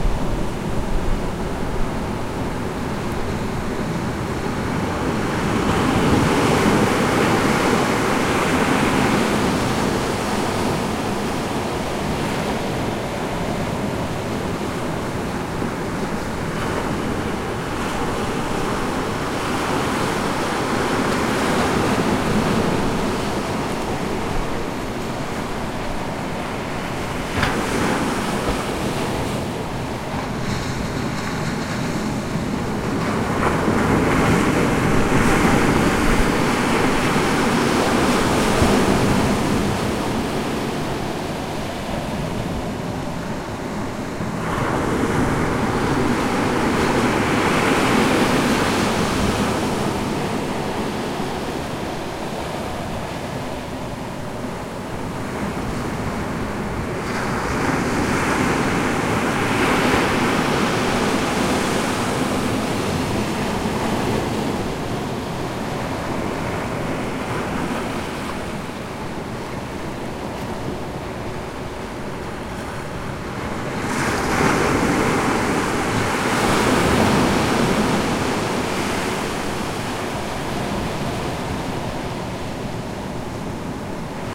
Ocean waves recorded from between two rocks. There's some slight wind noise that can be filtered from 150Hz downward. This is part of a series of recordings for an ambient music project I'm working on.
ambient, ocean-waves, phase-shifted, water, white-noise